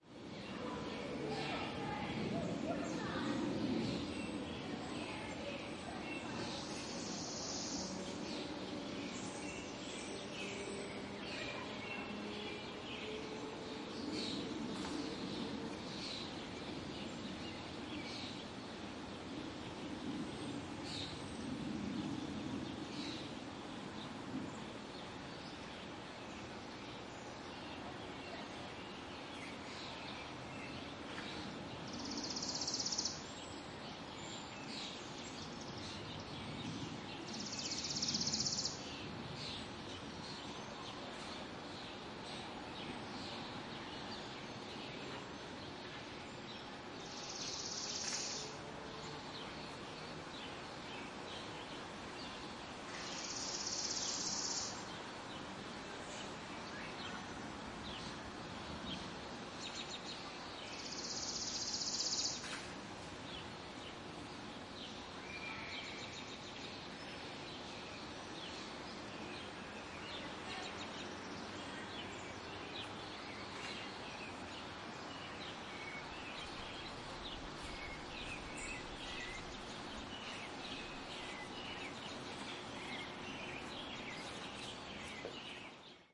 A recording of a typical busy, yet peaceful later afternoon sound-scape of a small midwestern town at 5:30PM on a weekday. This was recorded on Tuesday May 24th, 2016 using the Marantz PMD661 and two Sennheiser ME66 microphones...true STEREO recording.
You will hear the delightful sound of neighborhood kids playing a friendly - or sometimes not so friendly - game of wiffleball which adds to the reflective nature of this recording. The trilling bird calling towards the end of the recording is the beautiful little, Rusty-capped Chipping Sparrow. These Chipping Sparrows converge on the midwest around this time and their calls can be mistaken as an insect.
Enjoy this Summer 2016 recording and maybe it will trigger wonderful, innocent memories of your own childhood.